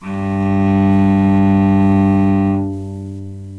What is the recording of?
A real cello playing the note, G2 (2nd octave on a keyboard) by playing the open G string (third lowest string). Eighth note in a C chromatic scale. All notes in the scale are available in this pack. Notes played by a real cello can be used in editing software to make your own music.